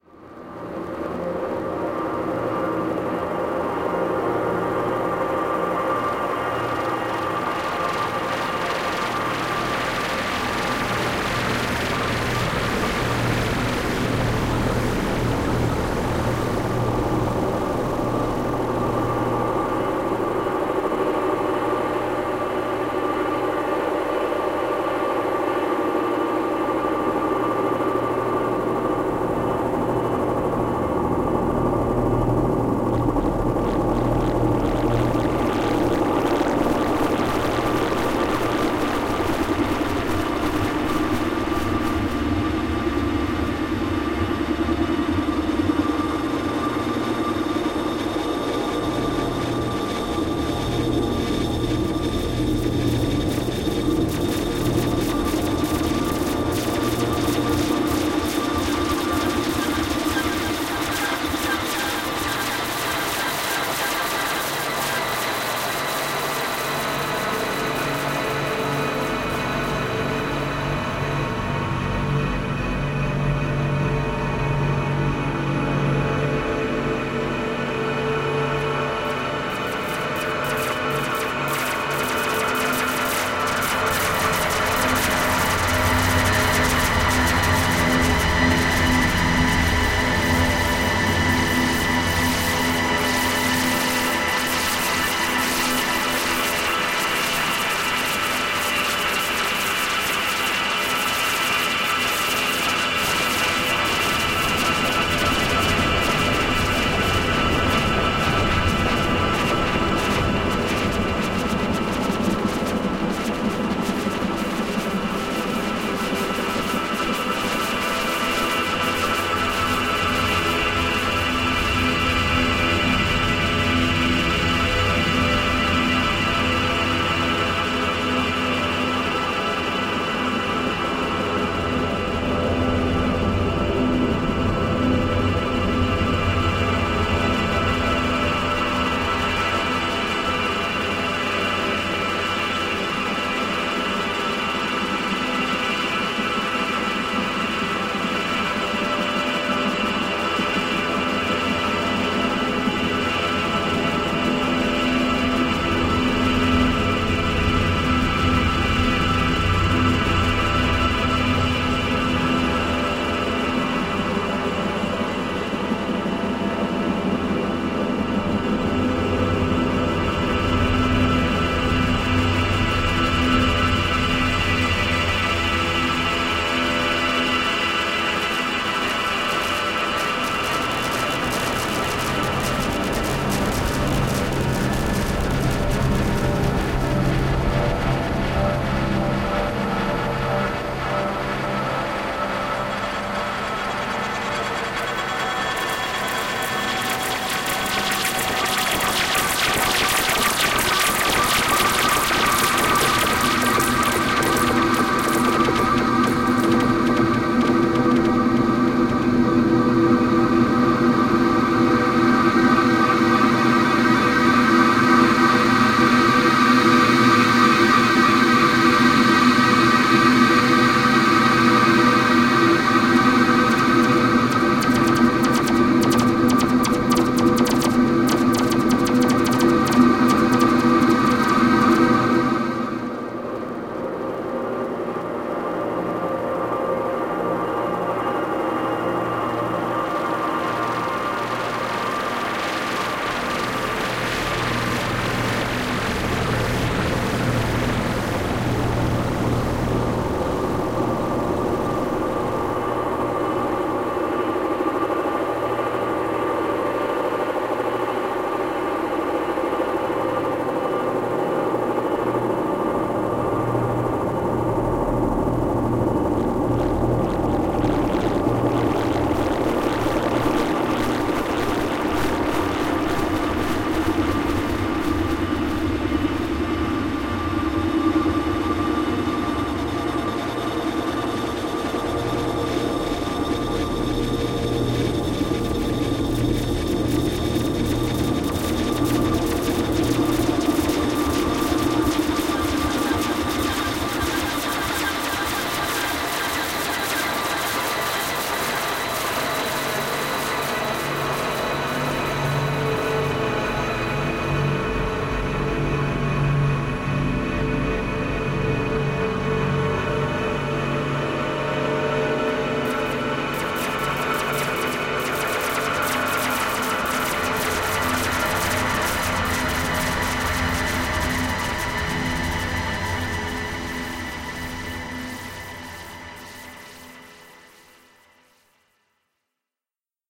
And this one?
Sound squeezed, stretched and granulated into abstract shapes
abstract, drone, noise